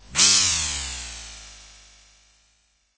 Artificial Simulated Space Sound 15
Artificial Simulated Space Sound
Created with Audacity by processing natural ambient sound recordings